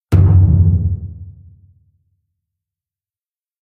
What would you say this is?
I mixed several of my recordings which had a 'bang' feel to them, and processed them with reverb, bass boost, and some other effects.
bang, drum, drums, echo, reverb